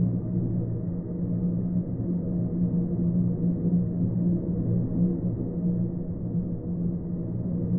Ambient space noise, made from a recording of me blowing across the top of a glass bottle into a microphone. Could be used for a space station or something.